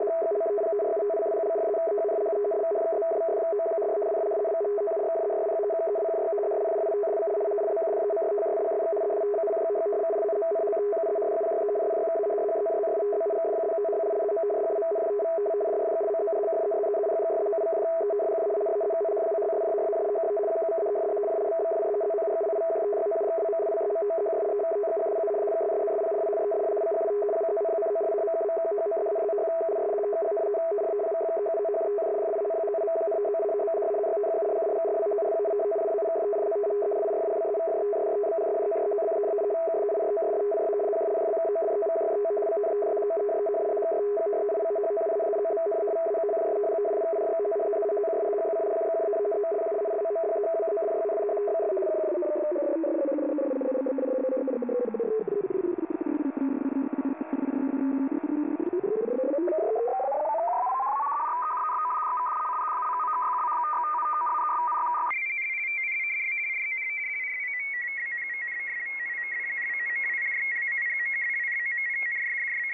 This is one of multiple samples I have recorded from short wave radio, and should, if I uploaded them properly be located in a pack of more radio samples.
How the name is built up:
SDR %YYYY-MM-DD%_%FREQUENCY% %DESCRIPTION% (unfortunately I didn't get to put in the decimals of the frequency when I exported the samples T_T)
I love you if you give me some credit, but it's not a must.